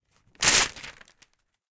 Tearing, Newspaper, A
Raw audio of rapidly tearing a sheet of newspaper. The metro has its uses.
An example of how you might credit is by putting this in the description/credits:
The sound was recorded using a "H6 (XY) Zoom recorder" on 11th December 2017.